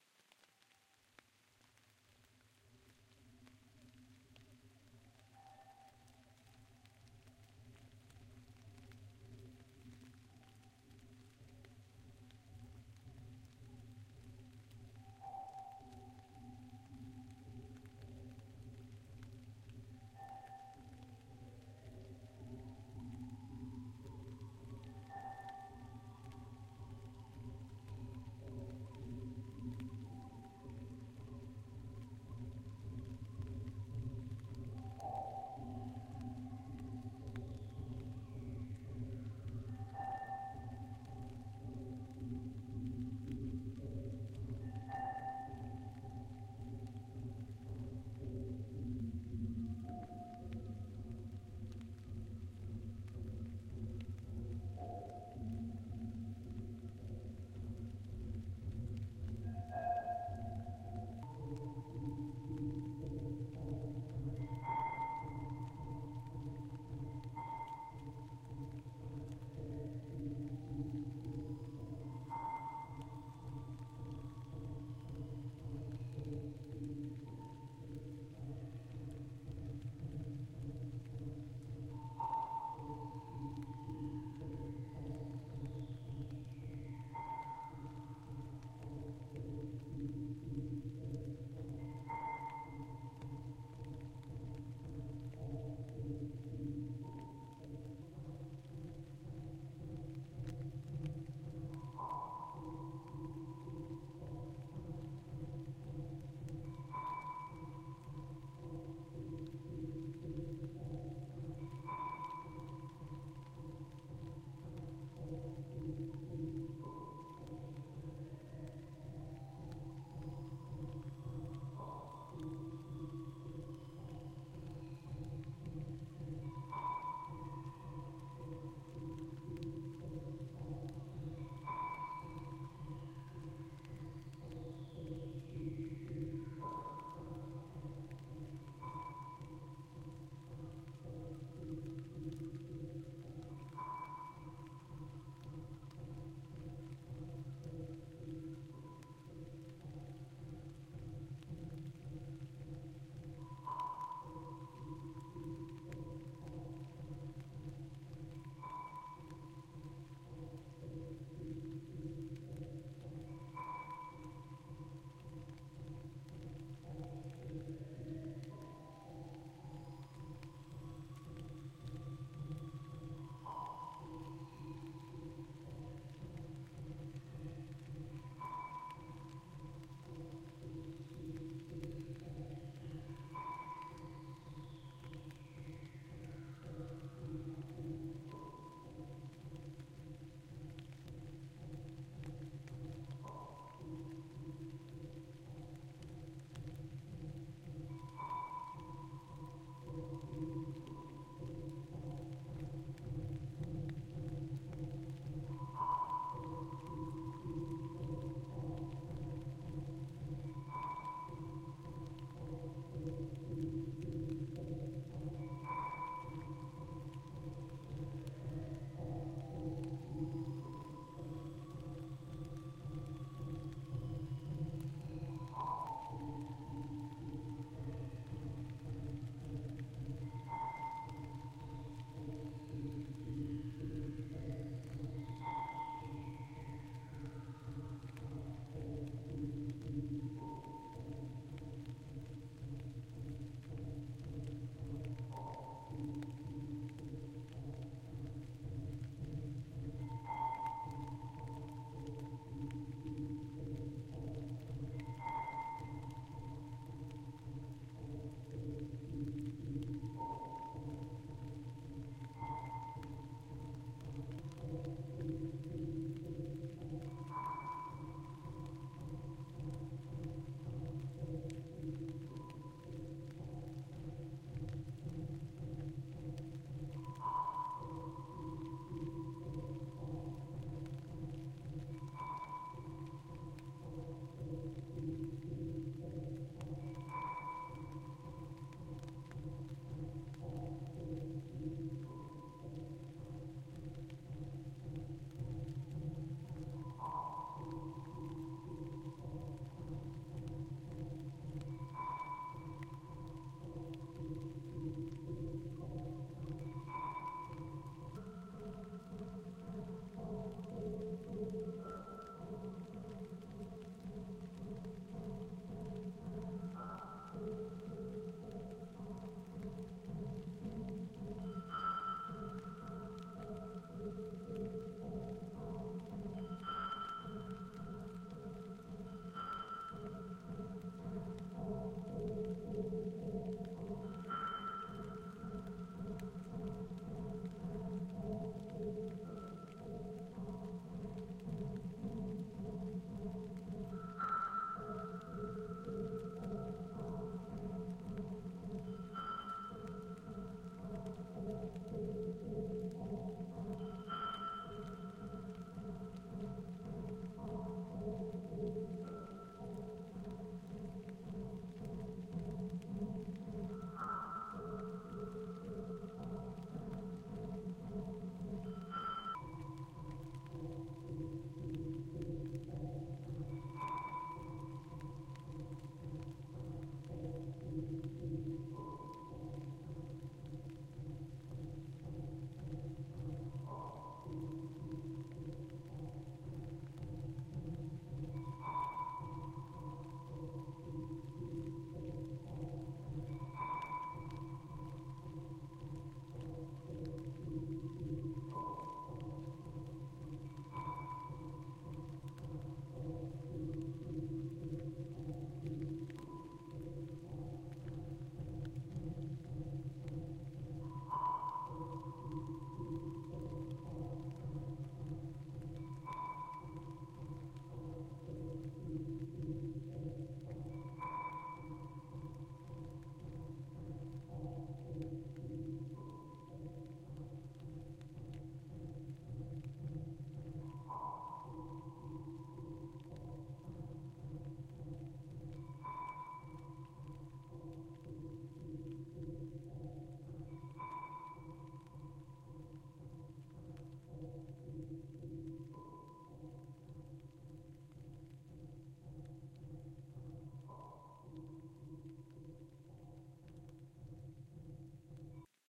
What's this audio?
This sound was produced in Audacity®, the Free, Cross-Platform Sound Editor. It's composed of 4 stereo tracks. Two were created by the ClickTrack generator for the drums in the background, one the track were given a longer tempo for the gong sound in the foreground, then a GVerb filter was applied to it to create the effect of sound attenuating down a long tunnel.